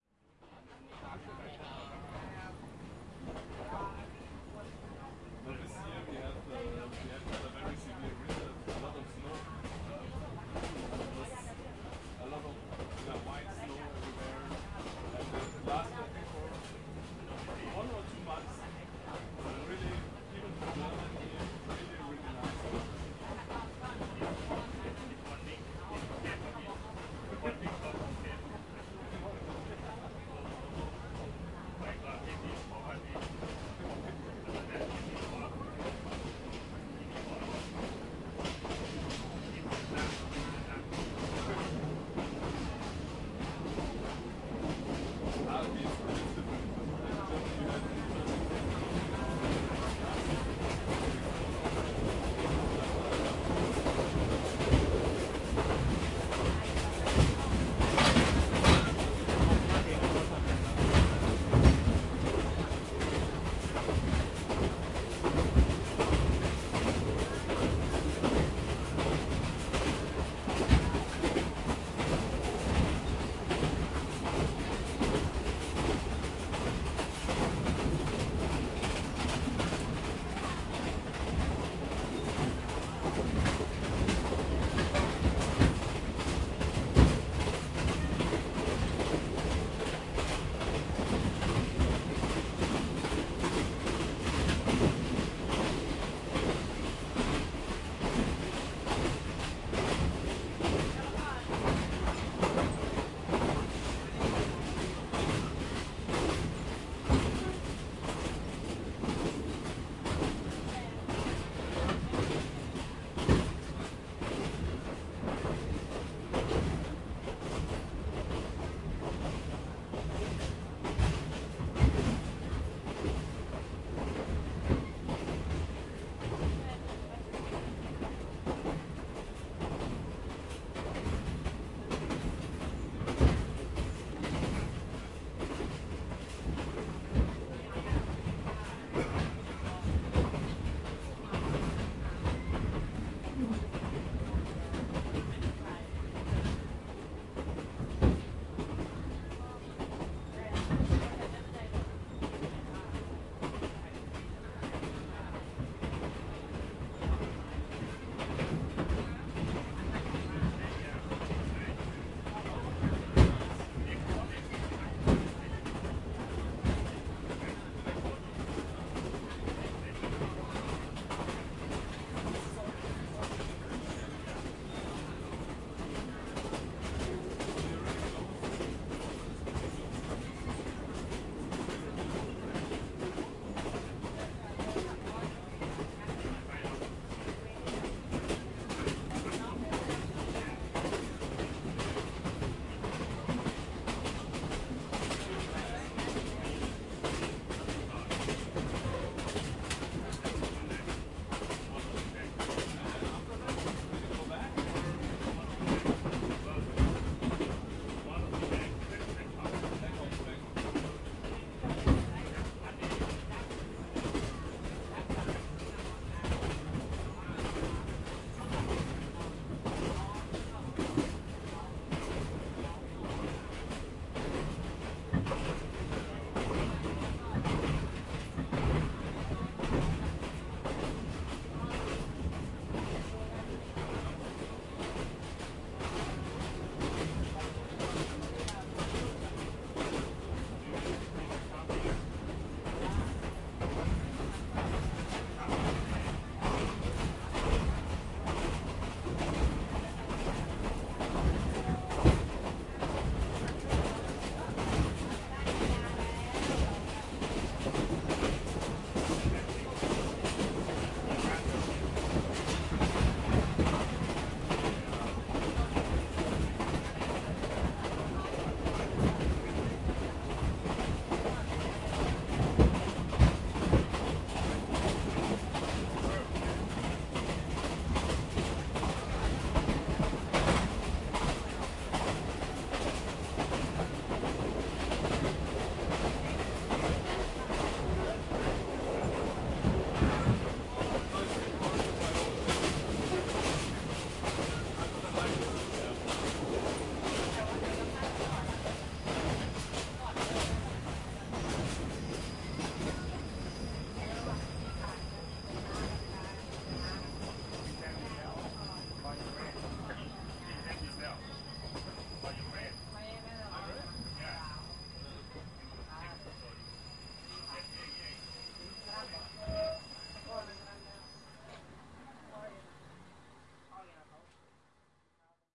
Train Thailand
Field recording in a Thai train, Recorded with a Zoom H4n.
noise, rails, field-recording, train, transport, sfx, people, fieldrecording, inside, ambience